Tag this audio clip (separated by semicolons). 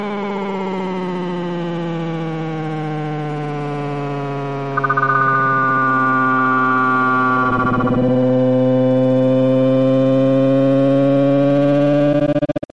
Death; RPG; UFO; wail